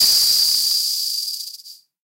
Arutria Drumbrute Analogue Drum Machine samples and compressed with Joe Meek C2 Optical compressor